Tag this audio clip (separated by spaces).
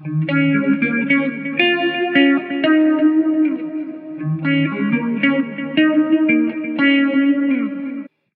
rasta onedrop DuB HiM roots reggae Jungle